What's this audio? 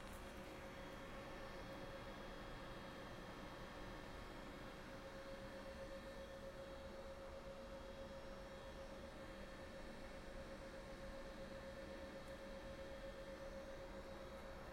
Janitor's Closet Ambience
I don't know if this is technically a Janitor's closet, persay, but it's some sort of closet with lots of cleaning stuff and electronics. It always makes a very lovely sound.
ambience, ambient, atmosphere, closet, electronics, janitor, Janitors, mechanical, noise, school